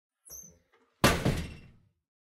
door squeaking 01
door squeaking. Horror suspence like squeak
creak, door, foley, horror, open, soundeffect, squeak, squeaky, suspense, thriller, wood